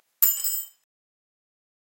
KeyFloor SFX
key falling on the floor sfx